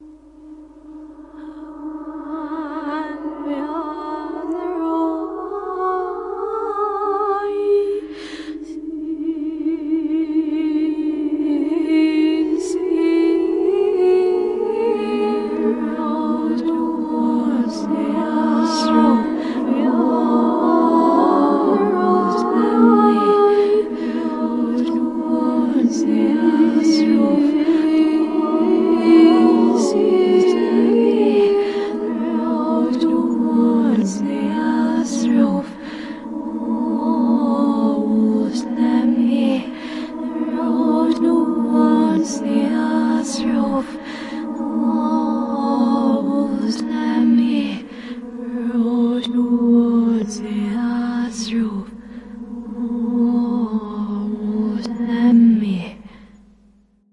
eerie reversed vocal
Are you looking for a weird clip that sounds like trapped spirits in a campy horror movie? Well look no further, friend! Why are they singing? Who knows! What are they singing? Who cares!
Recorded in Ardour with the UA4FX interface and the Behringer C3 mic.
inverted, ghosts, spirits, mysterious, backwards, eerie, deadites, campy